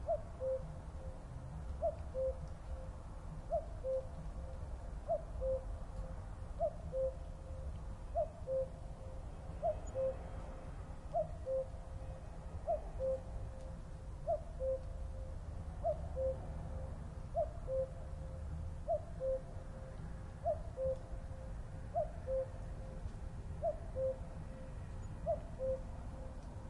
Recording of a cuckoo in Denmark in May 2011. Unfiltered.He sang all the night and most of the day and that for days! Sennheiser MKH 40 and Oade FR2-le.
ringtone, field-recording, cuckoo, denmark, spooky